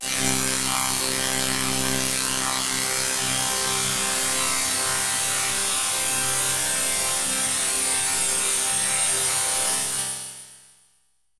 Granulated and comb filtered metallic hit
comb, grain